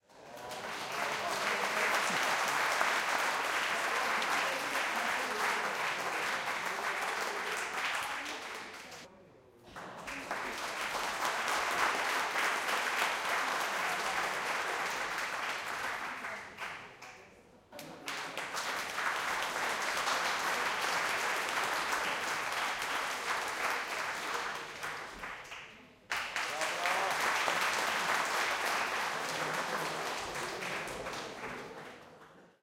231010 - Pozega - Magic Gold
Applause during magic show on corporate event, Požega.
ambience, audience, hand-clapping, applaud, aplause, applause